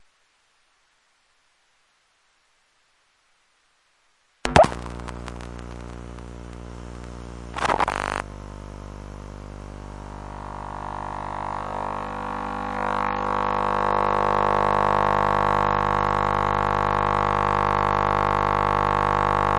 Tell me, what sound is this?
[Elektrosluch] CRT TV Powering Up
Electromagnetic field recording of a CRT TV using a homemade Elektrosluch and a Yulass portable audio recorder.
8bit, CRT, TV